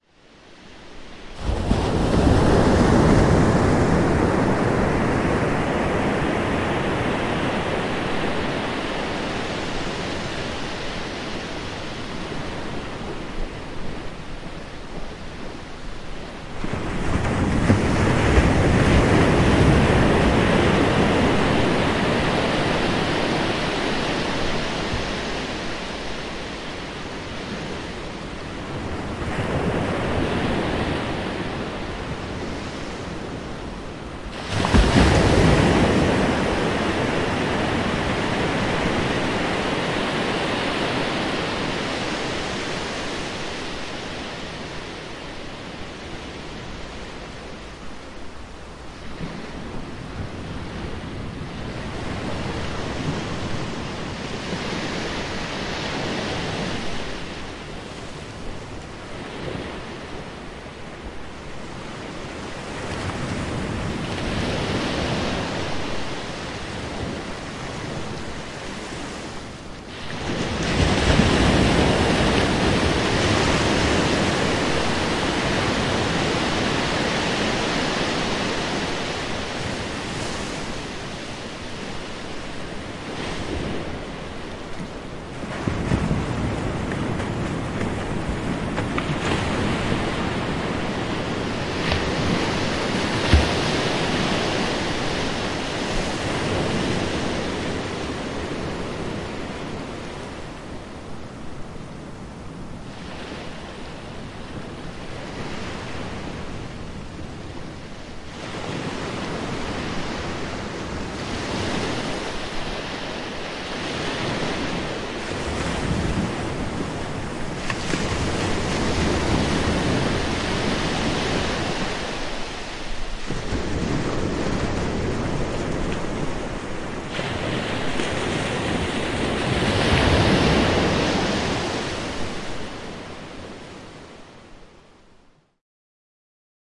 Raumati Beach Ocean Waves
Feild recording of waves at Raumati Beach, New Zealand at night.
Recorded with a Zoom H1.
Field-recording, Water, shore, Peaceful, Sea, Waves, Ocean, vast, Nature, beach